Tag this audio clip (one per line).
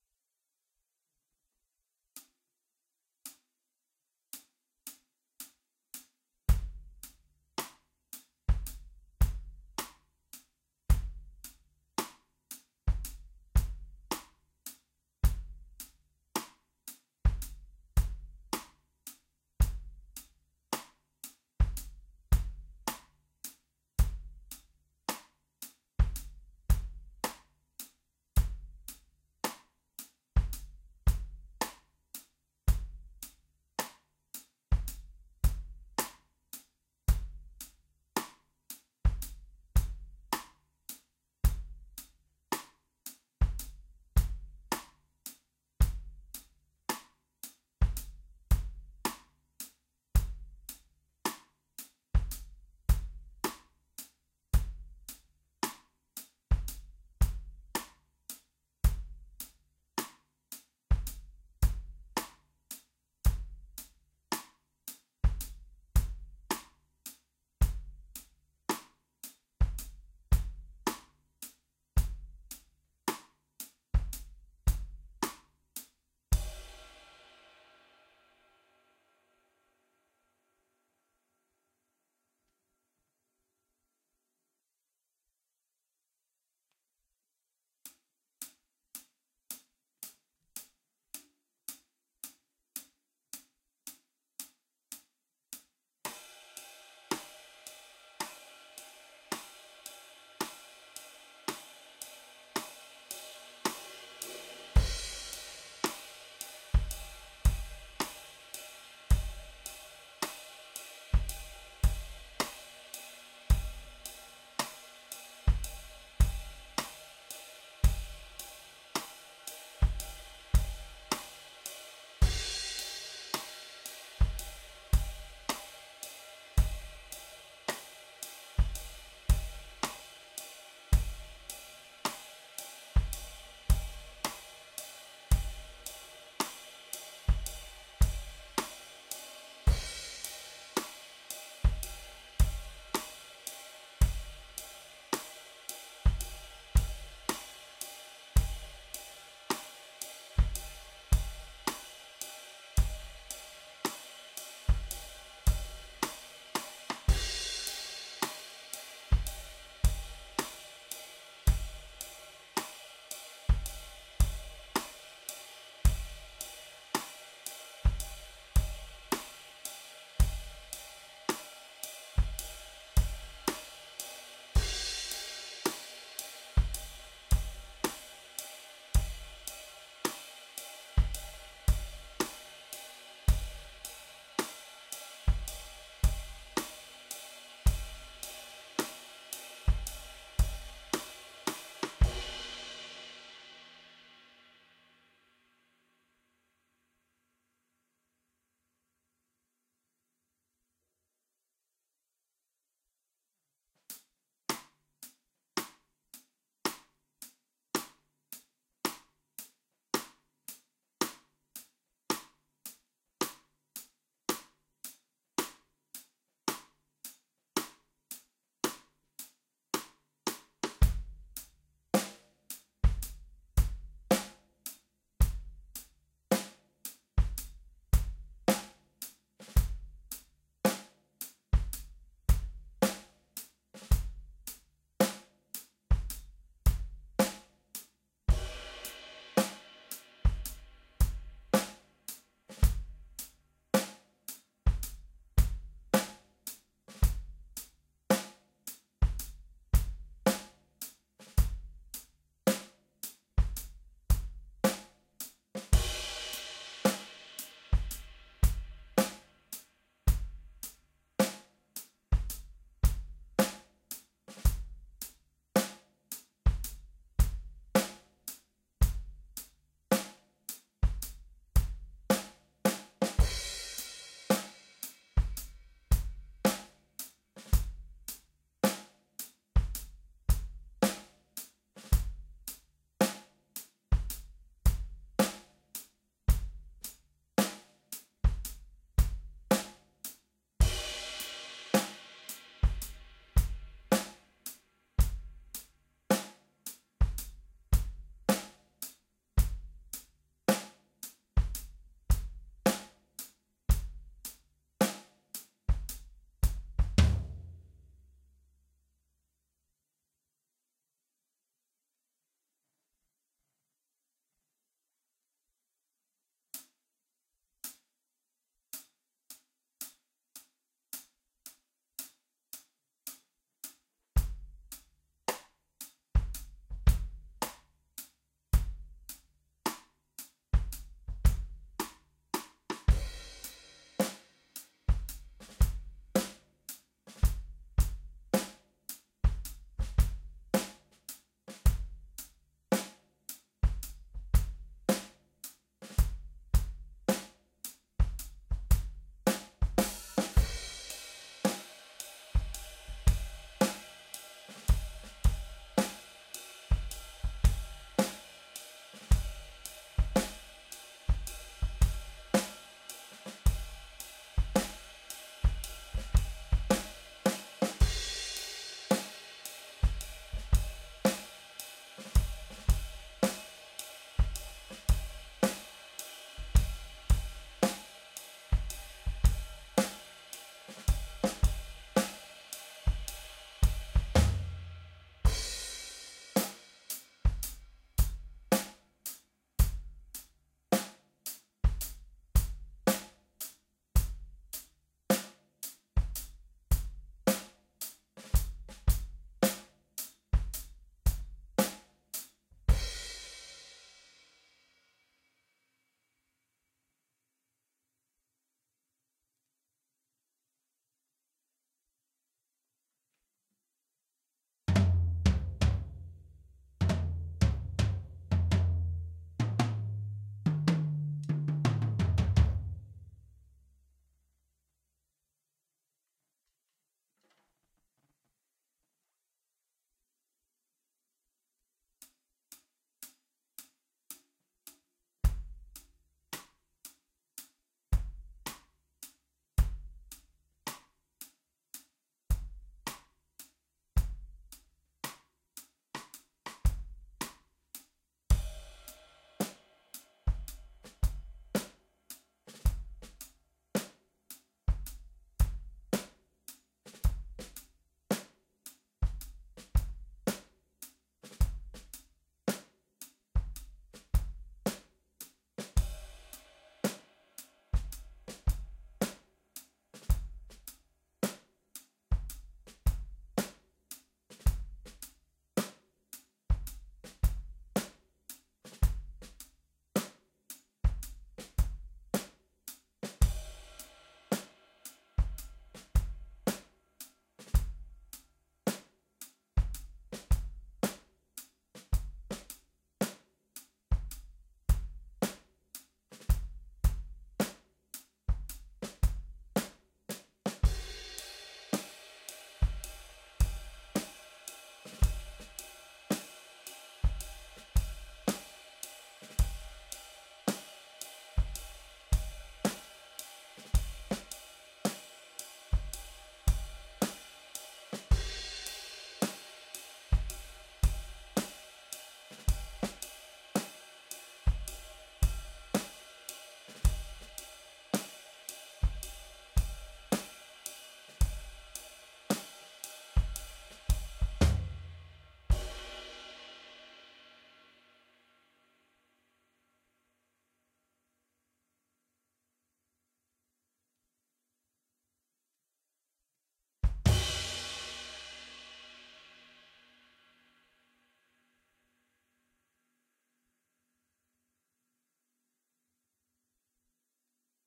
110-bpm
ballad
beat
drums